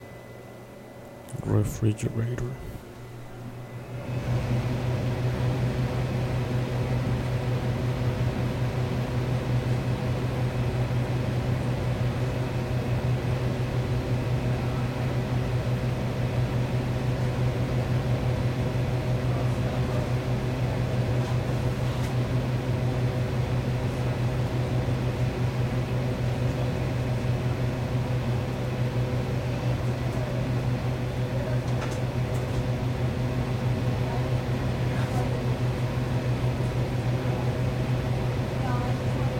hum from an ice machine
humming, fan, fridge, ice